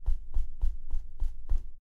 33-Pasos tierra (secos)
Sonido realizado para trabajo universitario tipo Foley,
Siéntase con la total libertad de Descargar y modificar este audio sin necesidad de acreditarme.
UPB
rupestre, Rustico